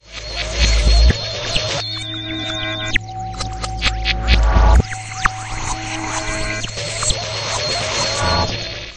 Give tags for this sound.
birds electronic dissonance alien synthetic whistle loop attack

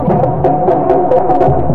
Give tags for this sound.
fx remix texture atmospheric

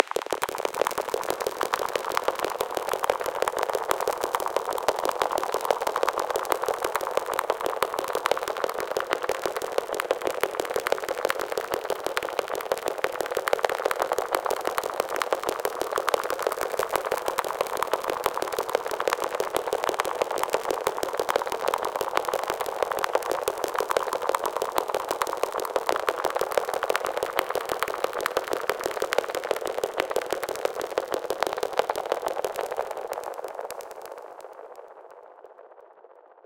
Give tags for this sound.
blupps noise grainy grain filter crack crackles

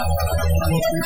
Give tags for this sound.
lo-fi noise glitch

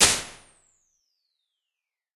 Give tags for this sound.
Response Impulse

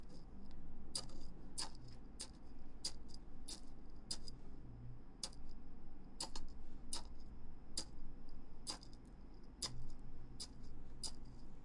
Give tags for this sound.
cangrejo marino

